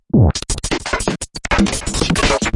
hello this is my TRACKER creation glitchcore break and rhythm sound
drums, breakcore, funky, fast, groovy, breaks, loop, dragon, rhythm, 200bpm, idm, percussion-loop, core, glitch, breakbeat, noise, amen, improvised, break, beats, percs, drum-loop